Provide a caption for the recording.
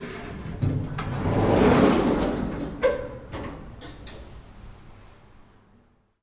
Lift Sliding Doors Opening 1
Metallic lift in Madrid. Rough samples
The specific character of the sound is described in the title itself.
knock, lift, metallic, percussion, rough-sample, sound-design, wooden